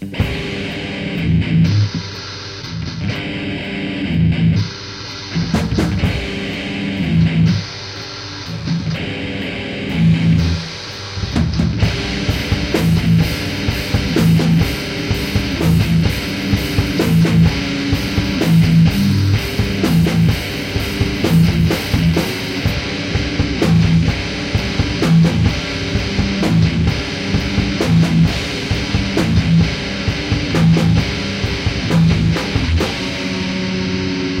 Metal Band Jam 2
2 electric guitarists and one drummer jam metal and hardcore.
Recorded with Sony TCD D10 PRO II & 2 x Sennheiser MD21U.